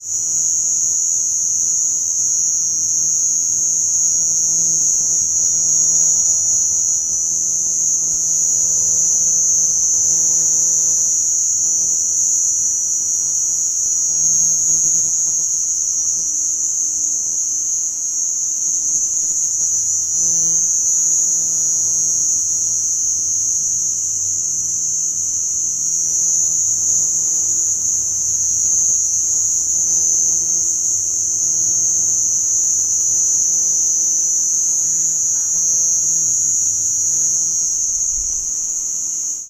Insects (I think mostly crickets) make this noise. It sounds so... infernal!, or futuristic (electronic for sure).
I recorded this (with my old Zoom II recorder) one Summer night in Tlacotlapan, at the Southern Mexican State of Veracruz.
All this happened for hours inside my hotel room. So you can imagine what kind of night I have. Wonderful memories.